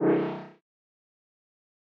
A sound of something being teleported
retro, soundeffect, videogame